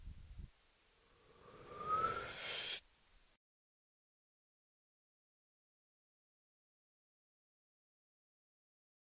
Breath Riser
I recorded myself breathing inwards to create a rising effect.
microphone, mic, samples, dynamic, reverb, riser, microphones, mics, awful